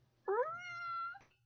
A 'meow' sound from my kitty Luna. Recorded with my microphone.
rawr, meow, cat, kitty, luna, sound, purr, fx